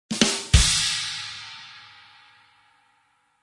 Joke drum fill 06
A short drum fill to mark when a good point has been landed in a joke. Each with a different variation.
Recorded with FL Studio 9,7 beta 10.
Drums by: Toontrack EZDrummer.
Expansion used: "Drumkit from hell".
Mastering: Maximus
Variation 6 of 10
comedy, crowd, drumkit-from-hell, drums, ezdrummer, fills, humor, jokes, laughters